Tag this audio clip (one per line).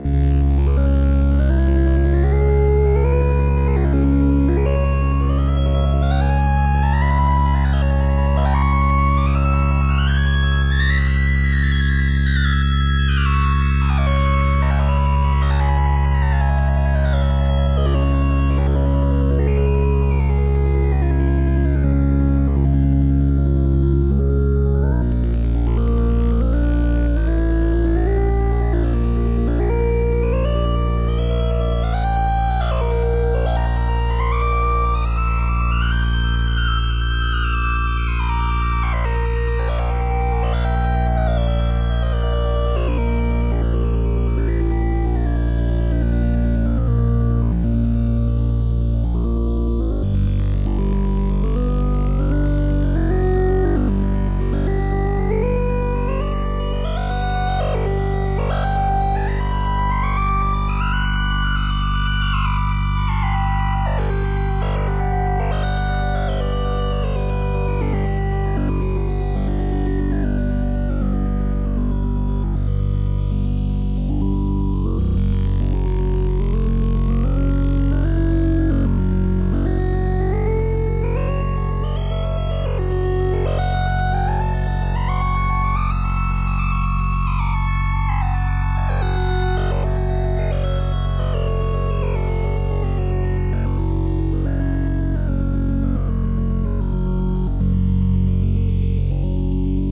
abstract
arp
audio
design
effect
fl
fx
loop
movie
sfx
slow
sound
stretch
studio
time